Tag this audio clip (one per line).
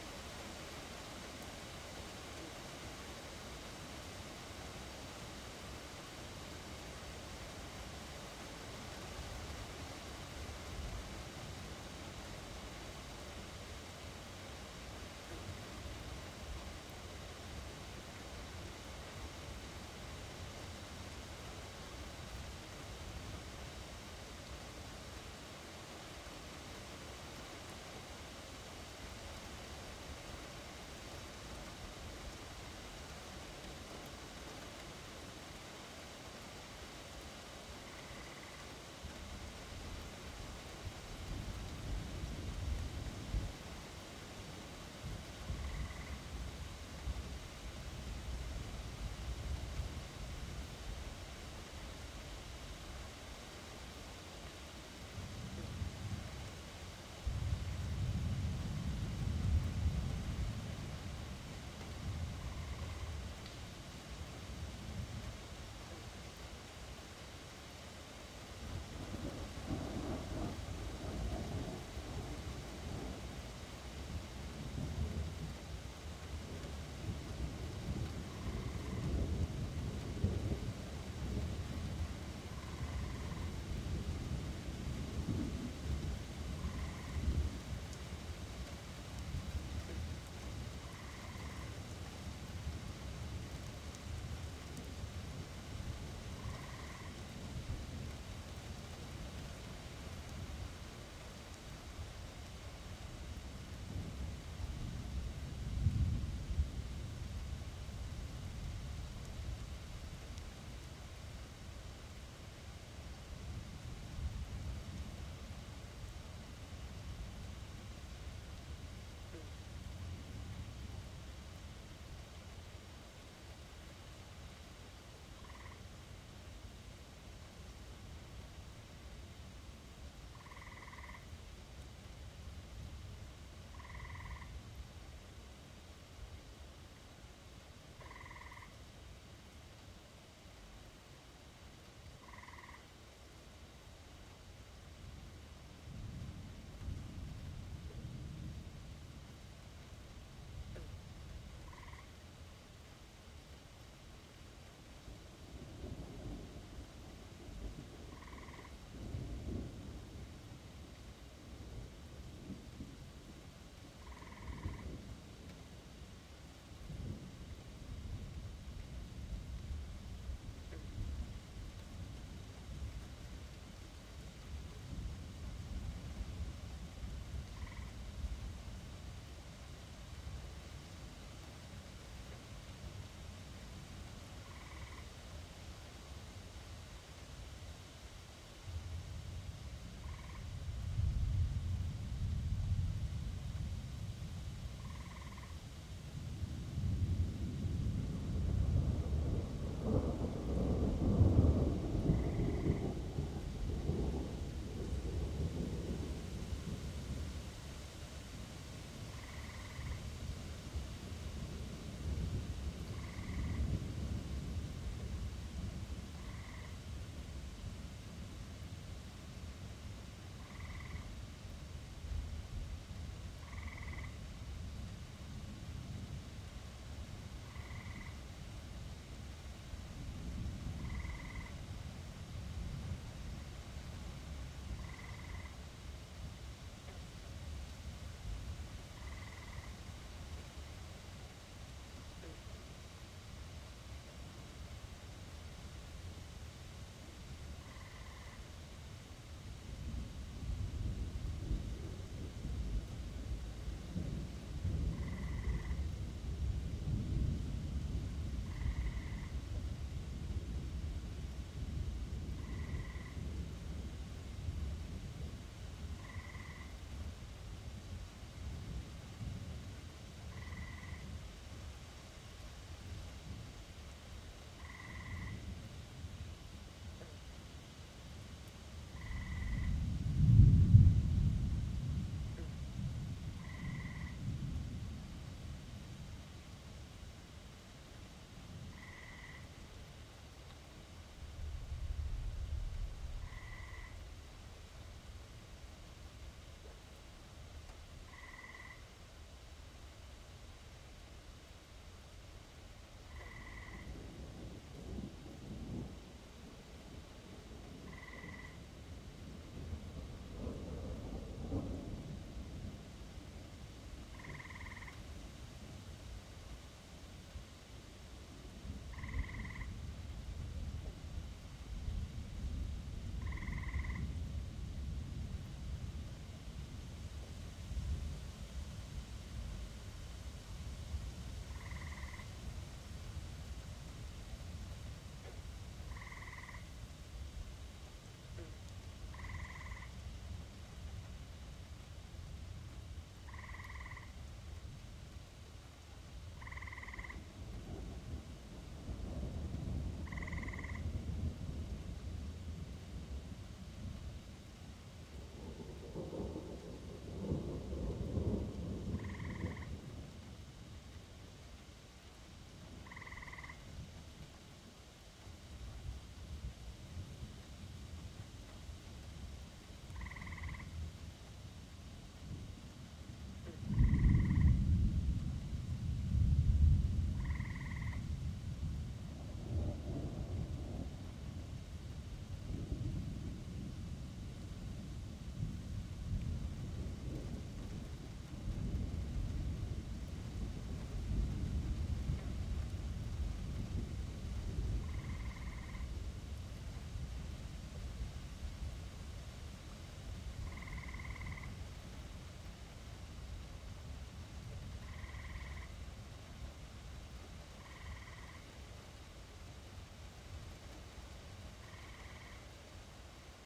ambience
field-recording
nature
rain
storm
thunder
weather